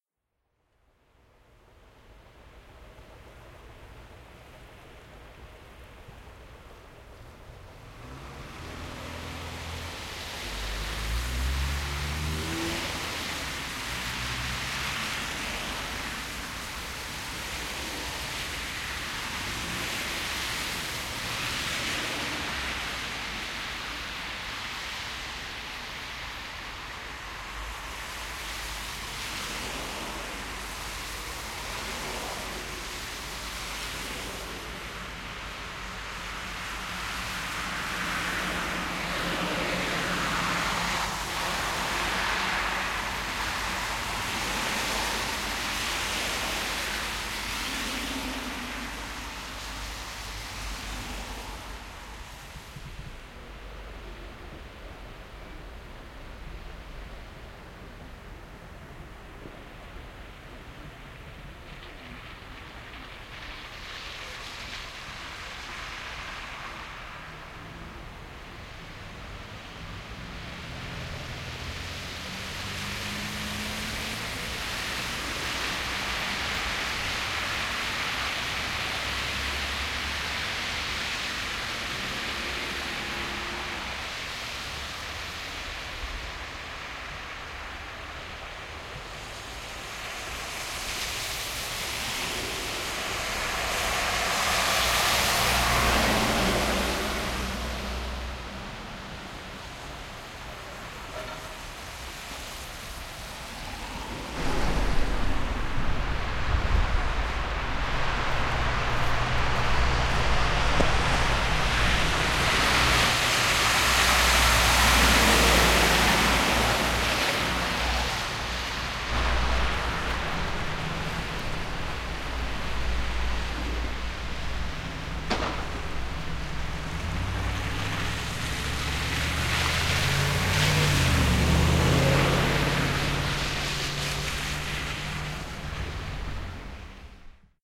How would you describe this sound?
Traffic passing cars truck bus city med fast street red light wet snow winter